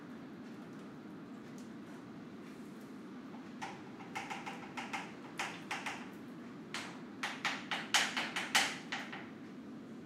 FX - manipular objetos de cocina 5
food, kitchen